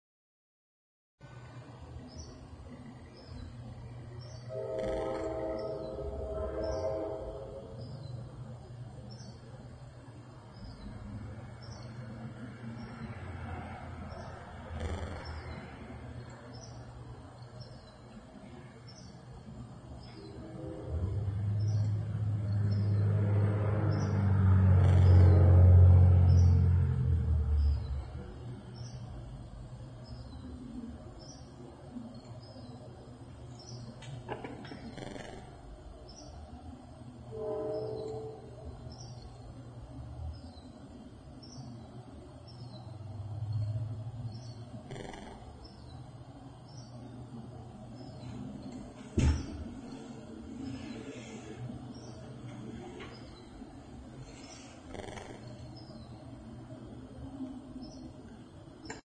A recording Halleck made of "the ambient sounds outside my room in the early morning. Birds chirp and a train whistles in the distance. Sorry about the occasional glitches." Passed Halleck's sound through SoundSoap just to see what he / you thought.
birds, bird